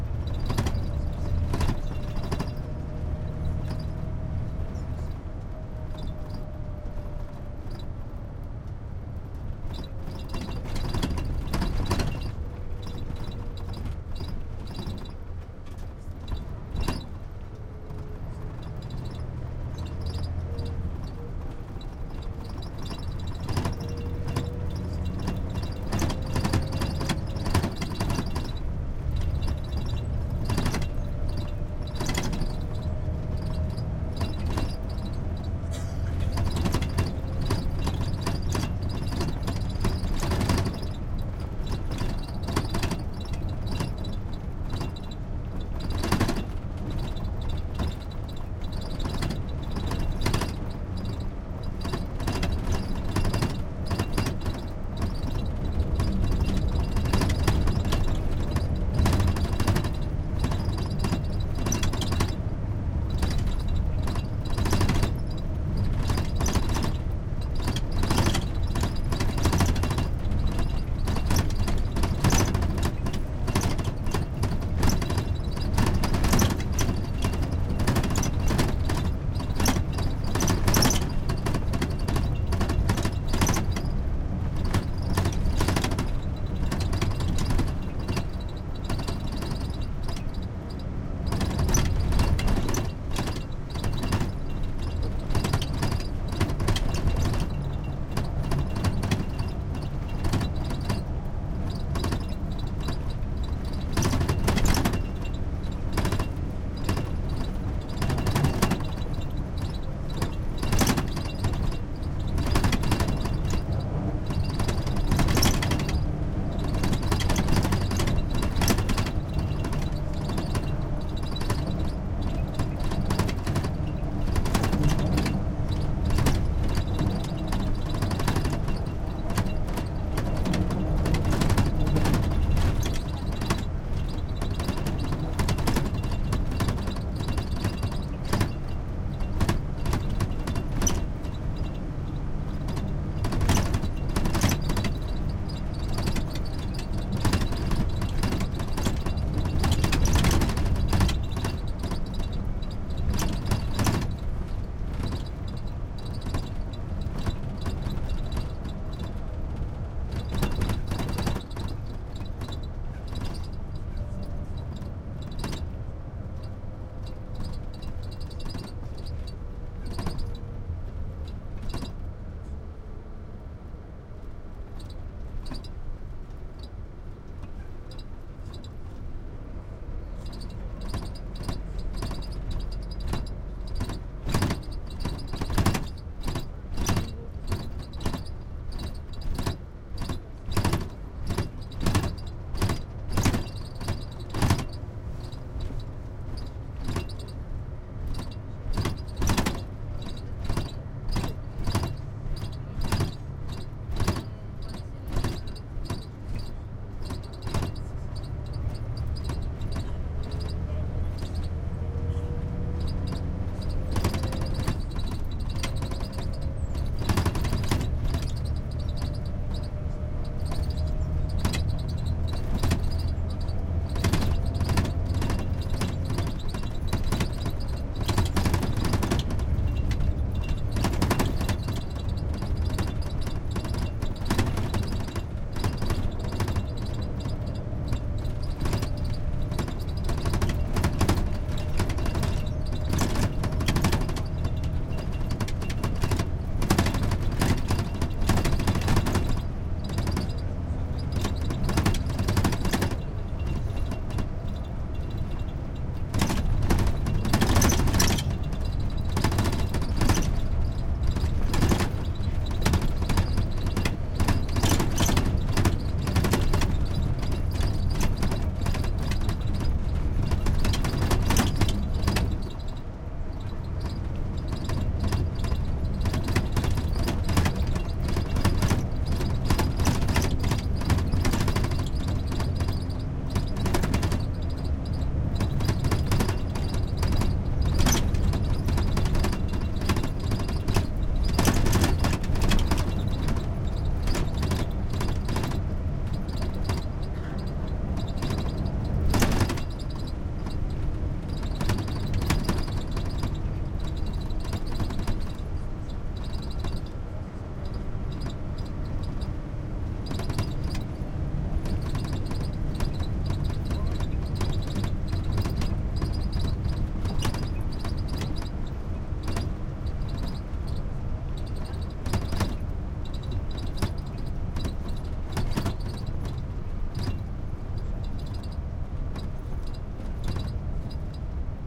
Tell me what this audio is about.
bus rattly TV frame metal plastic squeak
bus, frame, metal, plastic, rattly, squeak